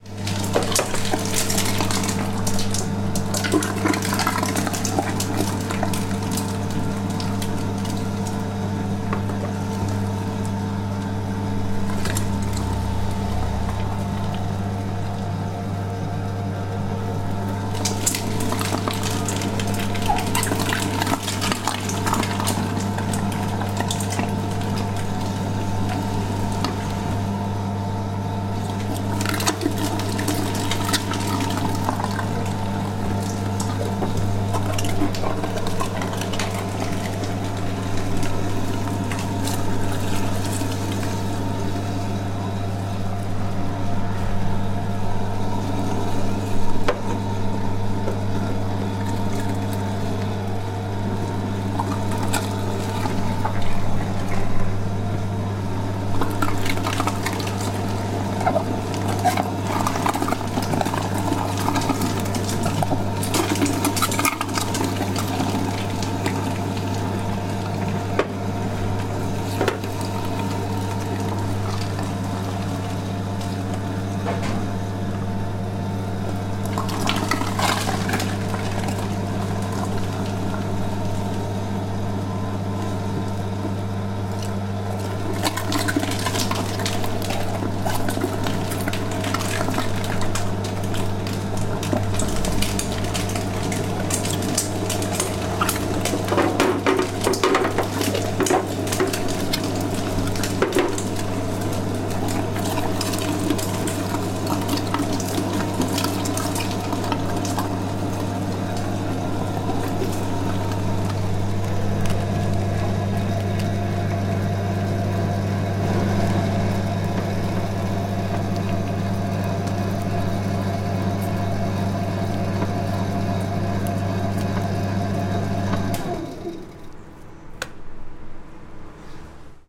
While shooting on location I was able to record some pretty squelchy sounds during a faggot making process.
I couldn't quite get the microphone where I wanted as camera was pretty insistent on not moving but these were recorded using a Sennheiser MKH416 shotgun microphone. A cardioid would've been better as the room had a lot of reverb. Next time maybe...